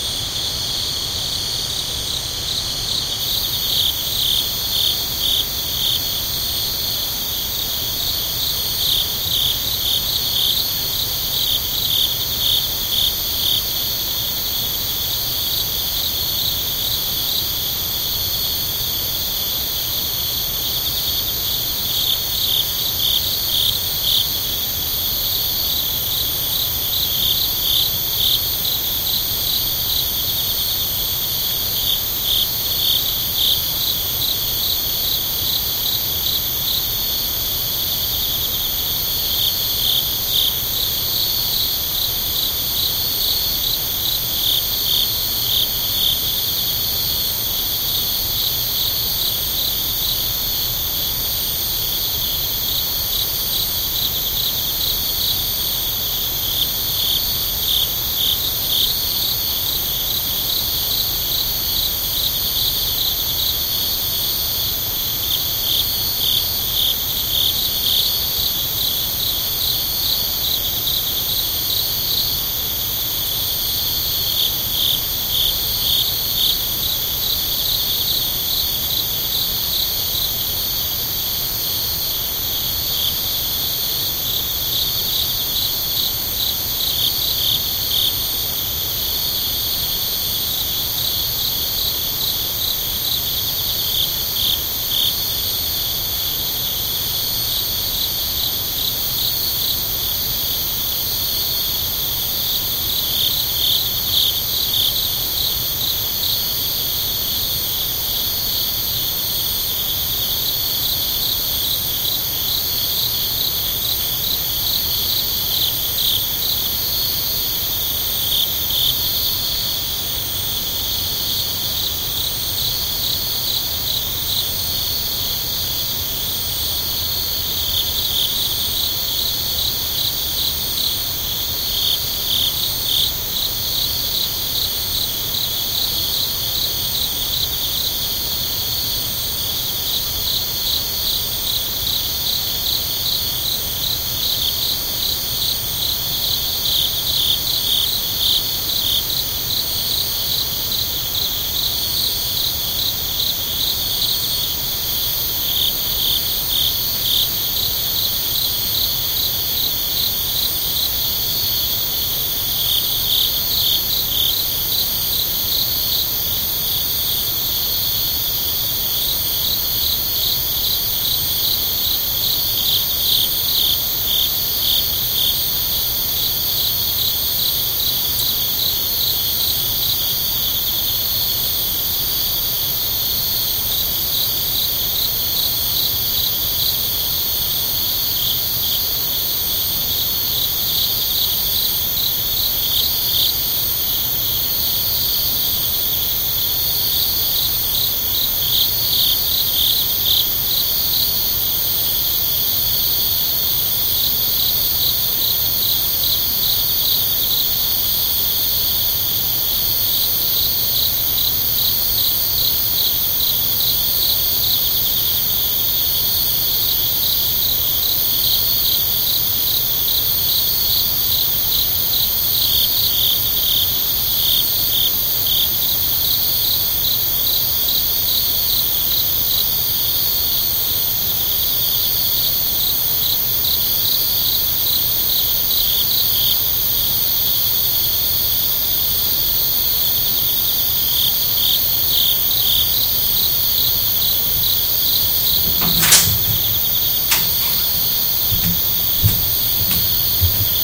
This is a stereo recording made from the window of a traditional Japanese farmhouse (called Village Ina), in Ina, Nagano, late september 2016. You can hear the crickets/cicadas/bugs outside very clearly. Several patterns coming and going. Recorded in MS stereo with Zoom H2n handy recorder.